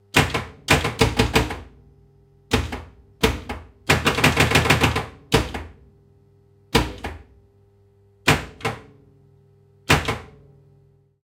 pinball-flipper hits
Flipper hits on a 1977 Gottlieb Bronco Pinball machine. Recorded with two Neumann KM 184 in an XY stereo setup on a Zoom H2N using a Scarlett 18i20 preamp.